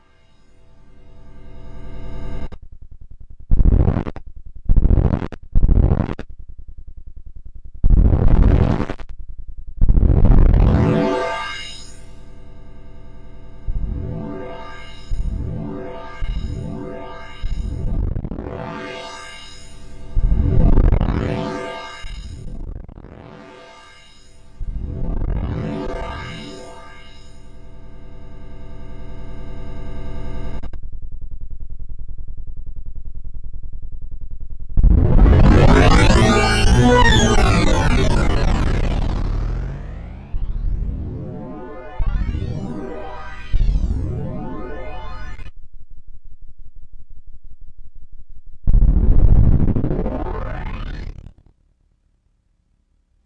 pitch feedback 2
Some sound going through a Yamaha SPX50D set to Pitch A with feedback on 99%. At first the pitch just goes up, but then it eventually breaks up and I also adjusted the settings to make strange things happen.
noise, feedback, glitch, digital